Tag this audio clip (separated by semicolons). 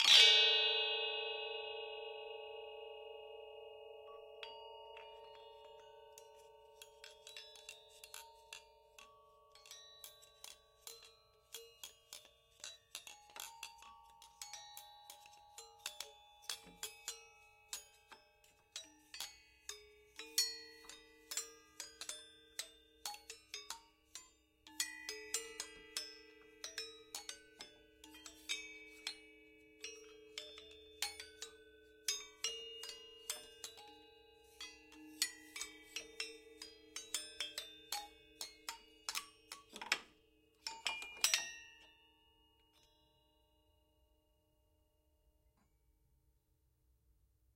gamelan; percussion; bali